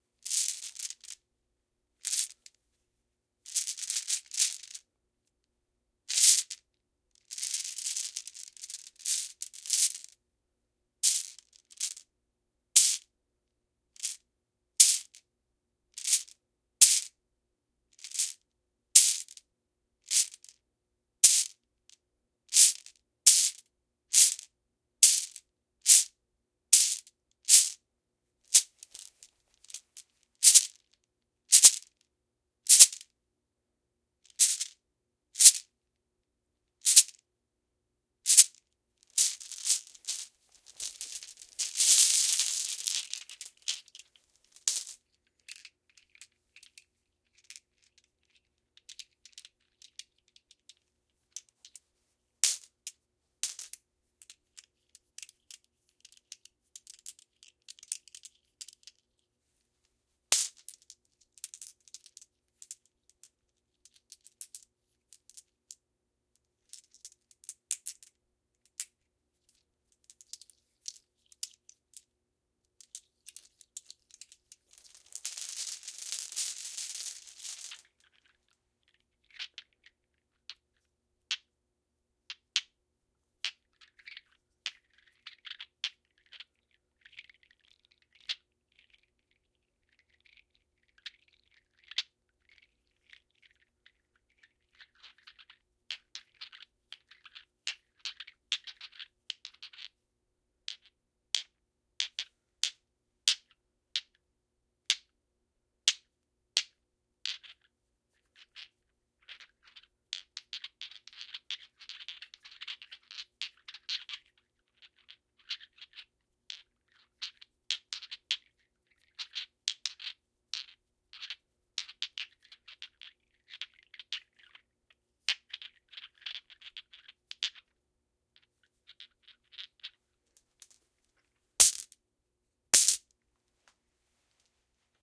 An assortment of sounds made using a cloth bag full of glass marbles.